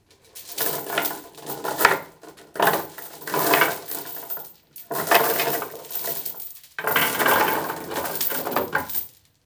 DRAGGING CHAIN
For the 2021 version of Christmas Carol I recorded myself dragging and dropping and rattling a number of heavy chains. During the Marley Scrooge scene I would clip out segments from these recordings for the chain sounds.